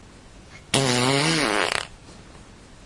fart poot gas flatulence flatulation explosion noise weird
fart, explosion, flatulation, noise, gas, flatulence, weird, poot